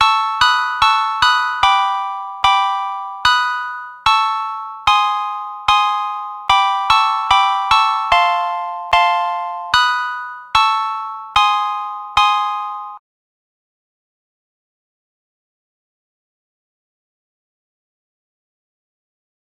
A short creepy piano sound perfect for a videogame.
calm, creepy, magic, meditation, music, noise, piano, spa, study, wave, Zen